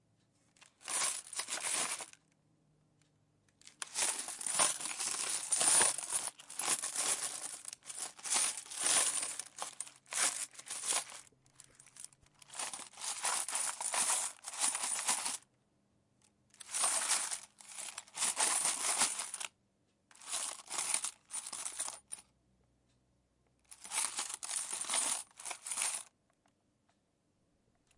Moving around coins! Ha! Recorded on the Zoom H5 stereo Microphones. Good for foley. Edited and cleaned up. Enjoy!